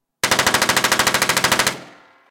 MP5 submachine gun down the block
Sounds recorded by me for my previous indie film. Weapons are live and firing blanks from different locations as part of the movie making process. Various echoes and other sound qualities reflect where the shooter is compared to the sound recorder. Sounds with street echo are particularly useful in sound design of street shootouts with automatic weapons.
Weapon ID: Heckler & Koch MP5A3 - 9mm
Army, combat, firearms, firefight, gun, gunfire, military, MP5, police, shootout, Submachine, SWAT, training, war, warfare